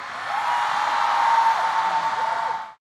181001 010 crowd cheer
crowd cheer, short, wow
applause, cheering, crowd